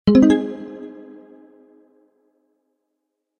Generic unspecific arftificial sound effect that can be used in games to indicate something was achieved or an item was found